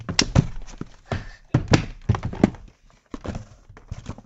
awfulTHEaudio runterfallen combo 01
more things falling on an carpet ground touching an metal chair, taken with AKGc4000b